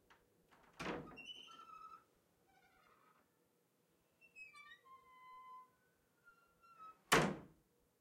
Closetdoor boom mono far
wooden, door, closet